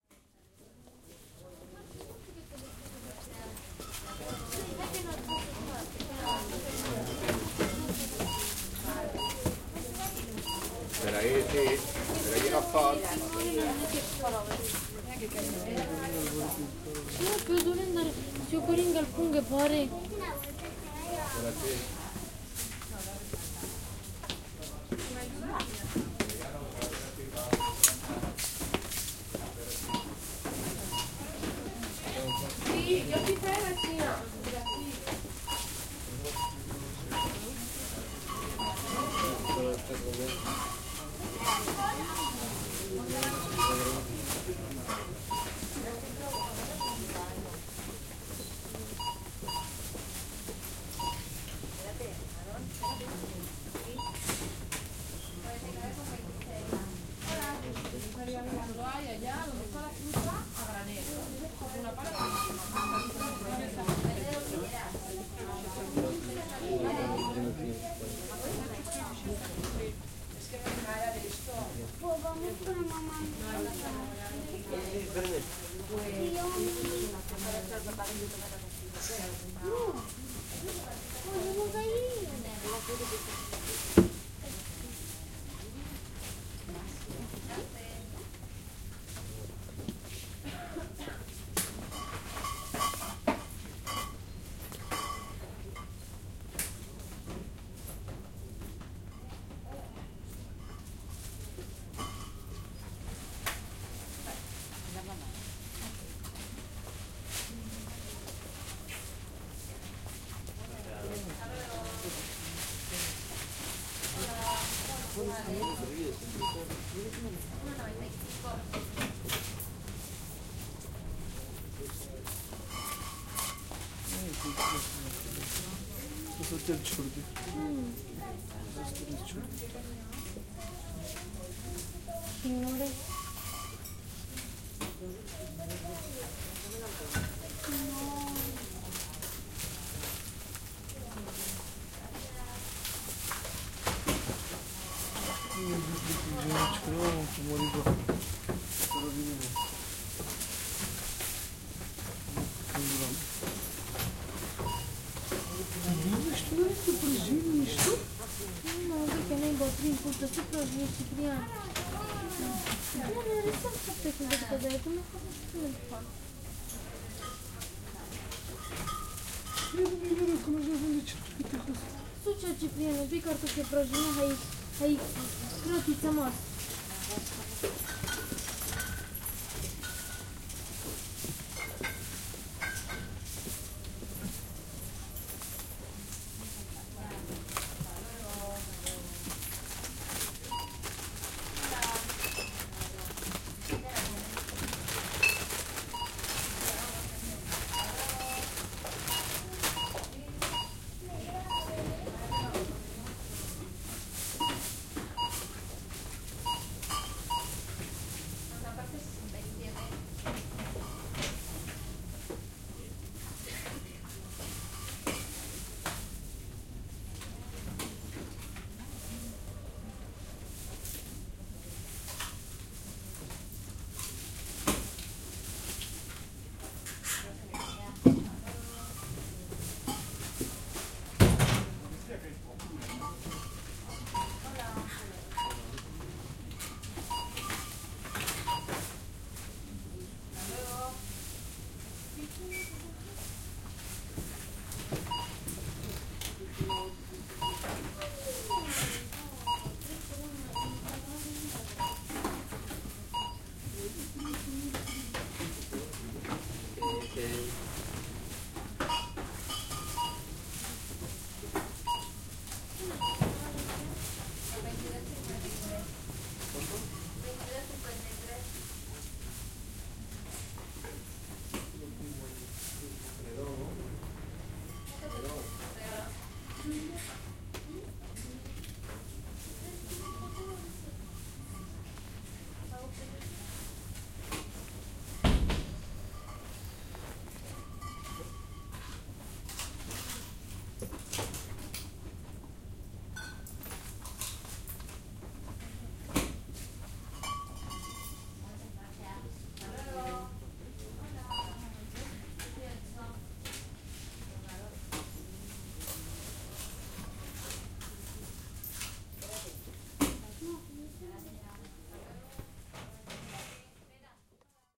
Supermarket inside
This sound was recorded inside a supermarket, next to the cashbox. The environment includes the sounds of people working and people buying.
footsteps, cashbox, bags, people, voices